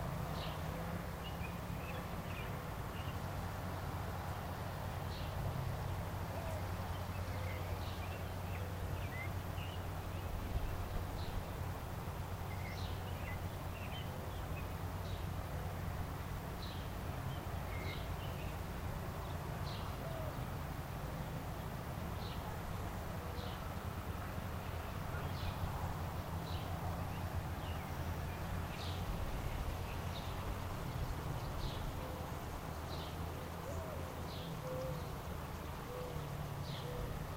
Ambient Nature (with birds)
I recorded this in my back yard a few years ago. It was in a very small town and also fairly close to a river.